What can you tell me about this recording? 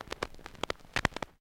Various clicks and pops recorded from a single LP record. I carved into the surface of the record with my keys, and then recorded the needle hitting the scratches.
analog, glitch, noise, record